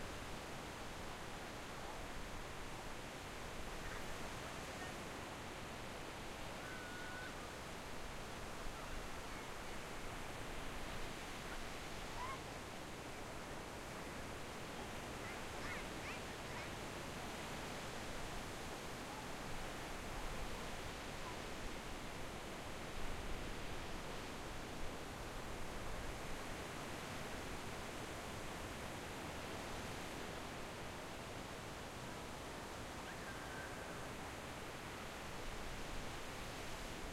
Ocean Noise - Surf and Gulls
Recorded in Destin Florida
Sounds of a beach with some small waves crashing. General ocean noise with some gulls in the mix.